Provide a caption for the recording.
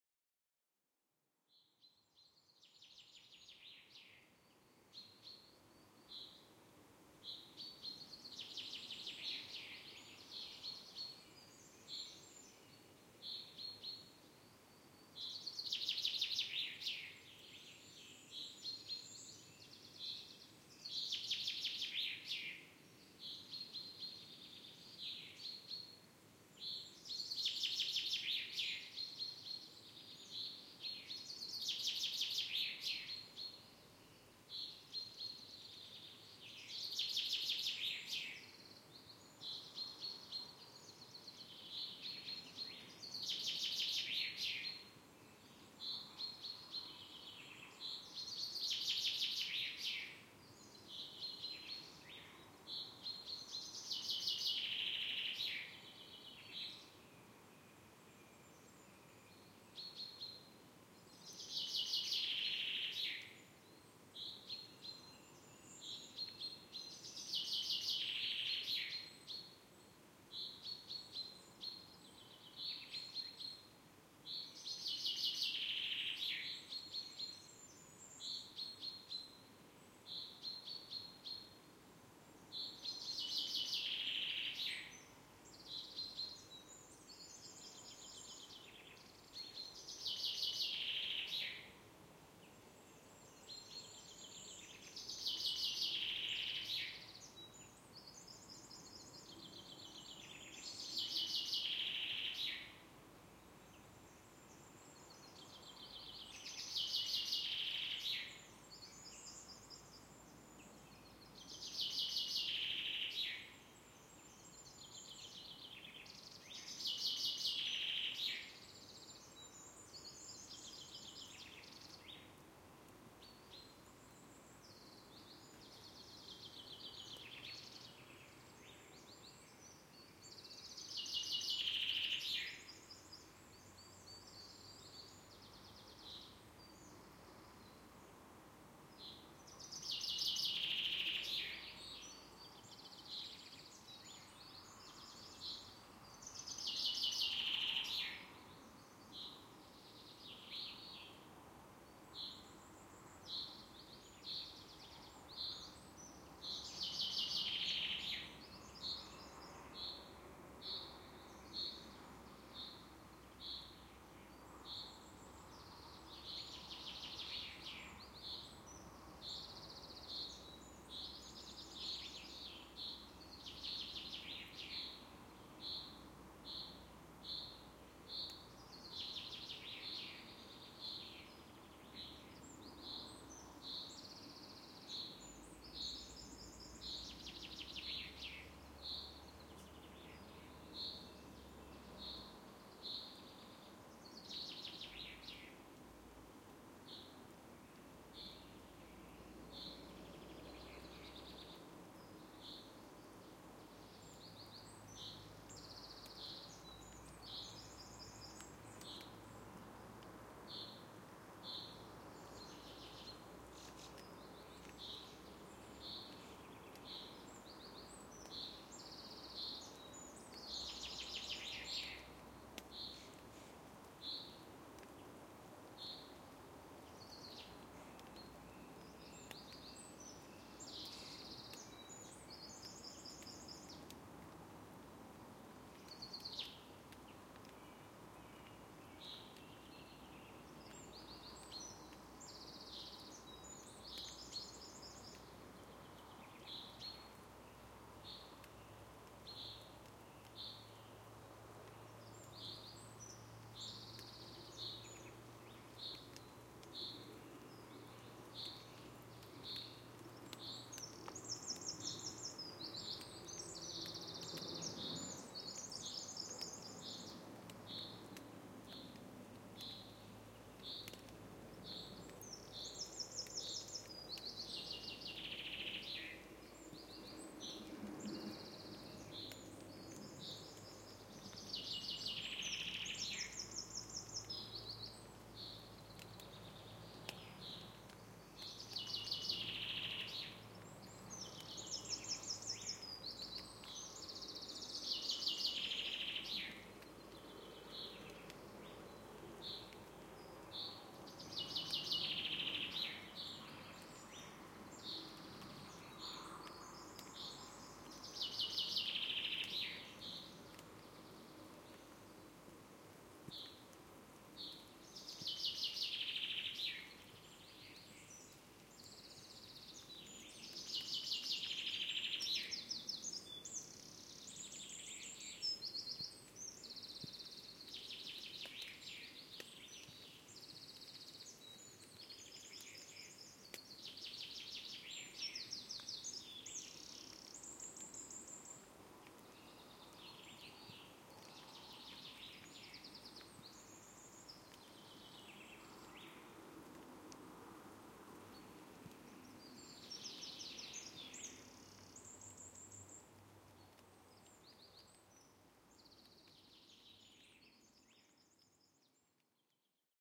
Connemara Woodland
Natural woodland ambience from Connemara, Co.Galway.
General birdsong (I can identify a Wren's song in the chatter) and low level traffic in distance. Some rain drop patter towards the end.
Recorded with B&K; 4006 pair, Jecklin disk, Sound Devices 442 and Marantz PMD 661.
Forest, Ambience, Nature